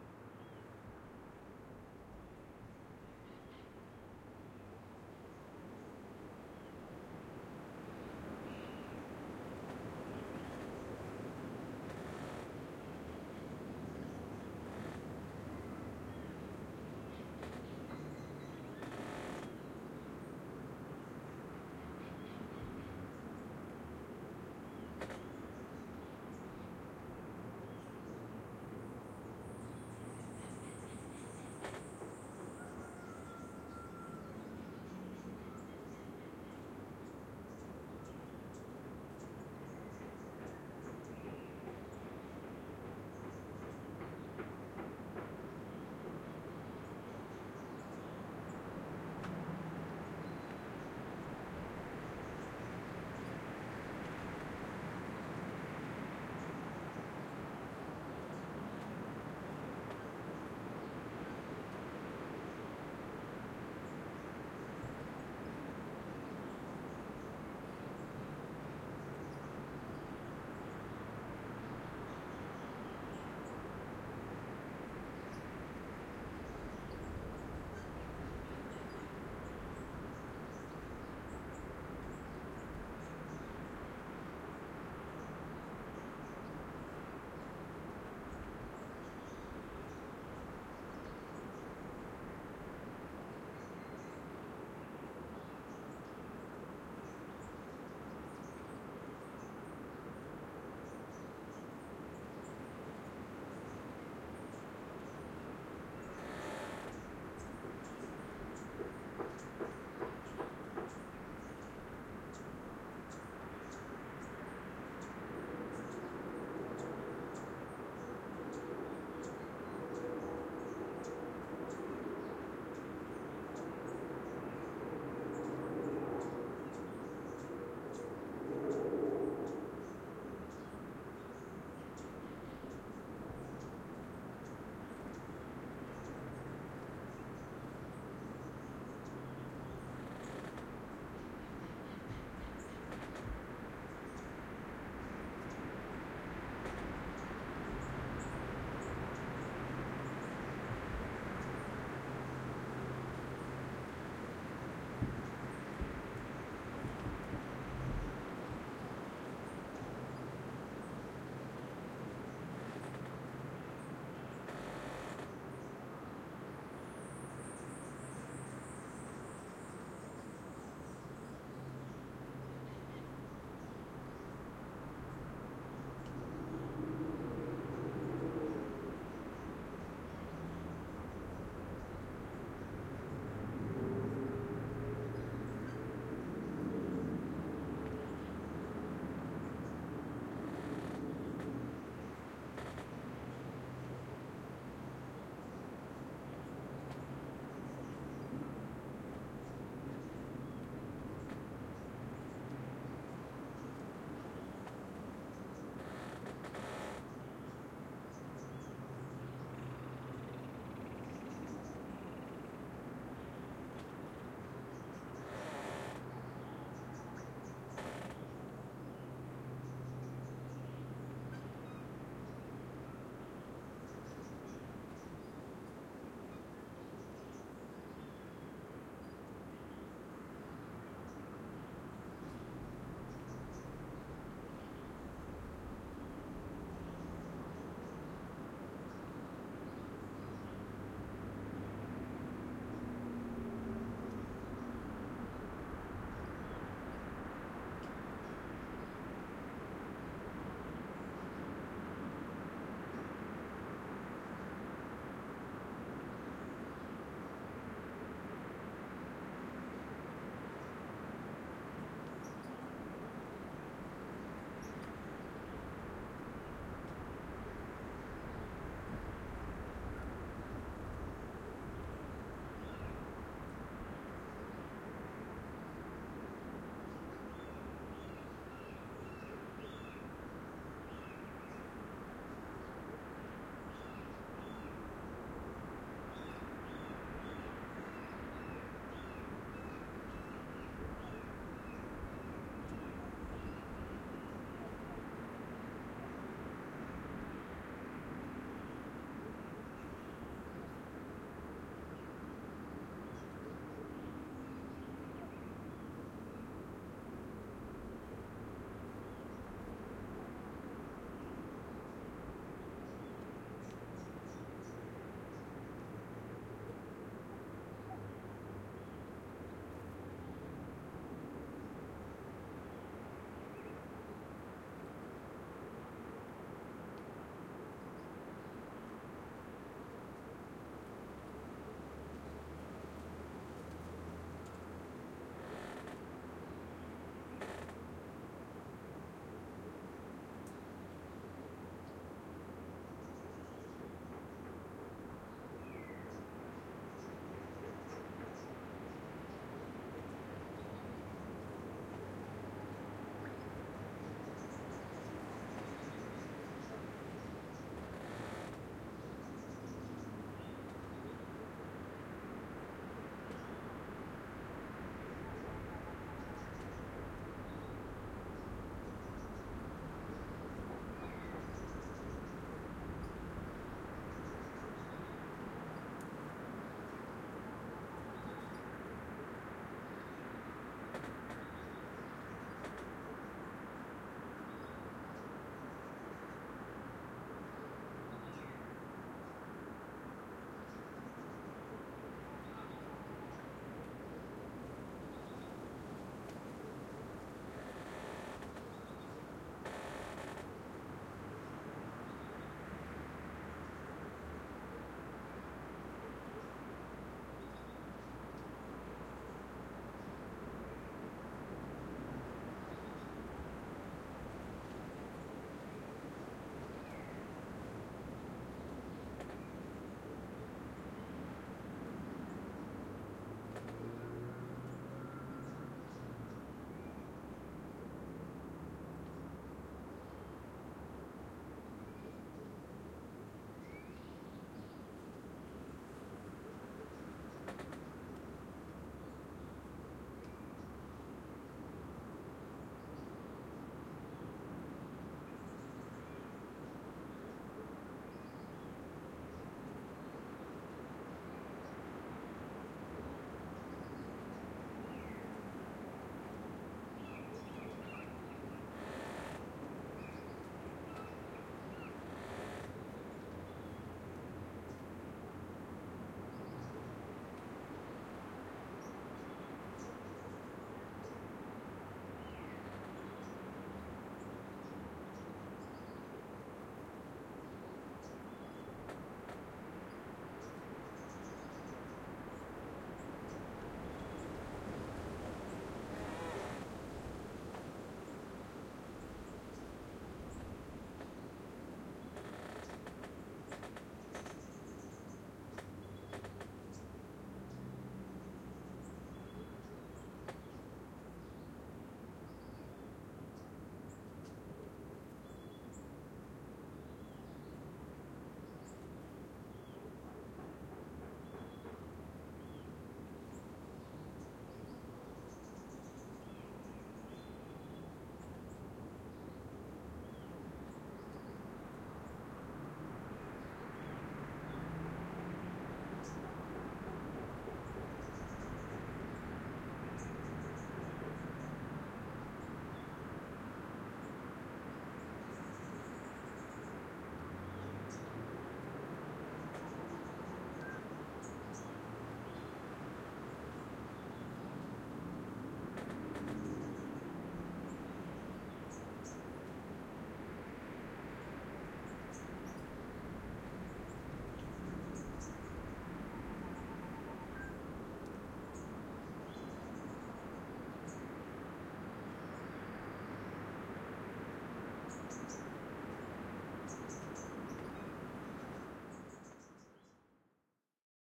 cr dry forest 01
An ambient field recording from a lower elevation dry forest near Monteverde Costa Rica.
Recorded with a pair of AT4021 mics into a modified Marantz PMD661 and edited with Reason.
ambient, animals, birds, birdsong, costa-rica, field-recording, forest, nature, outside, tropical, wind